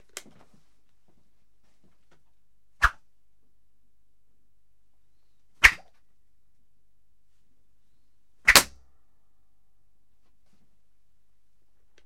a serie of three WOOSH
fouet, whip, wooshes